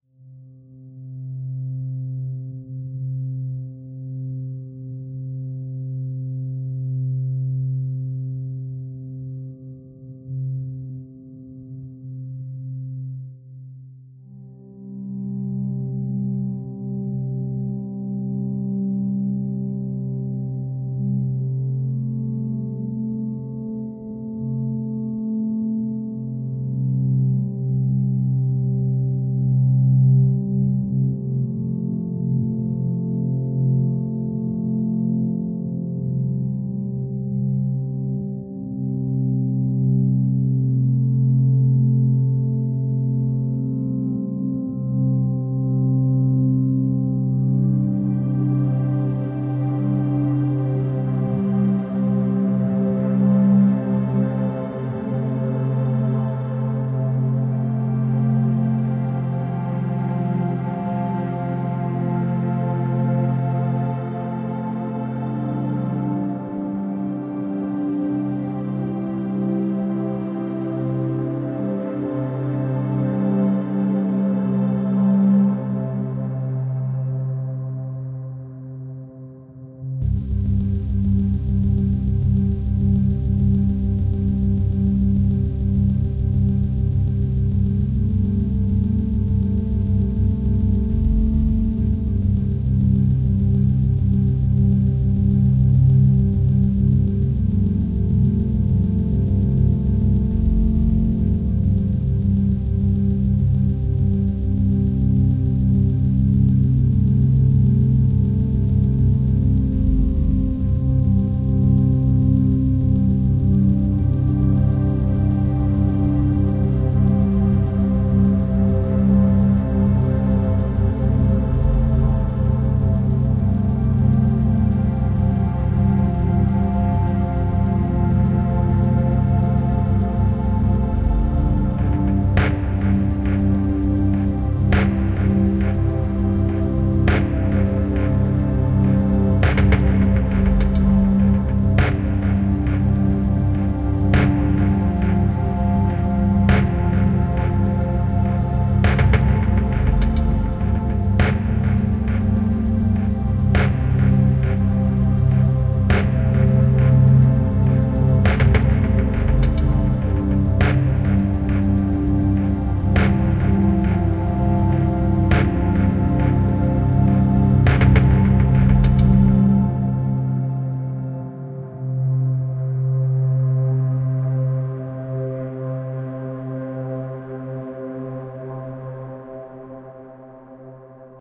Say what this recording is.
Need some non-intrusive dreamlike music for your space footage or documentary?
Perhaps you'll find use of this track then.
Fun fact: 'stasis' is a very unknown musical term referring to a
composition that changes and progress really slow and minimally. Like this
UPDATE: Thank you very much to the community for a star rating